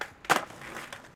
Skateboard Ollie Pop Shove-It
These field-recordings were captured for a radioplay. You can hear various moves (where possible described in german in the filename). The files are recorded in M/S-Stereophony, so you have the M-Signal on the left channel, the Side-Information on the right.